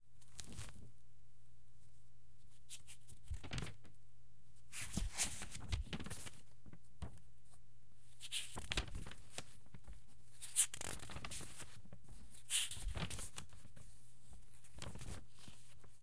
Book Turning Pages 01
Someone turning pages.
pages, paper, page, book, turning, turn